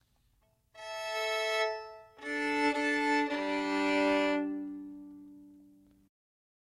violin tuning
Friend of mine tuning his violin. Needed some musical bits for a Sherlock Holmes play. RE50 to MD